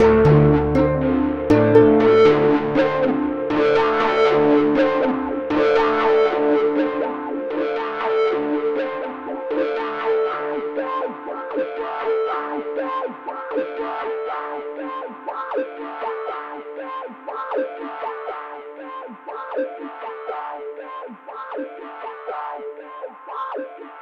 wah-sat feedback
A distorted keyboard comp with a tasty rhythmic wah degraded echo feedback effect.
echo, feedback, saturation, distortion, loop